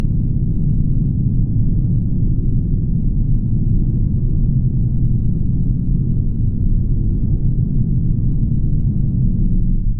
underwater roar
Muffled roar of water rushing around a drowning person
water, muffled, roar, stream, drowning, rush, gurgle, flow, river